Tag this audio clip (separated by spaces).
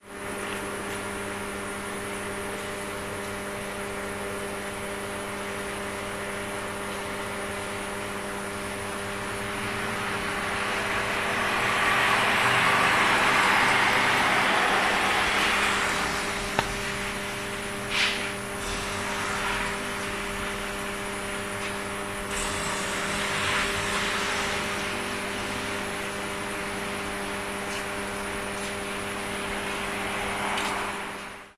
buzz buzzing field-recording noise polamd poznan street transfomer